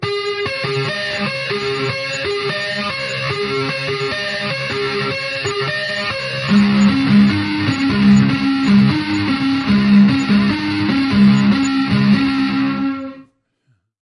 nice bird
simple keyboard pattern